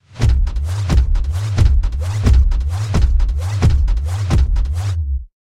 Simple, little sound design, of robot footsteps.